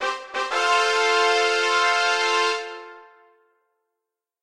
Success Fanfare Trumpets
A simple success/triumph/resolution fanfare made using the brass sound on Musescore. Enjoy!
bonus, brass, game, happy, resolution, success, trumpets, victory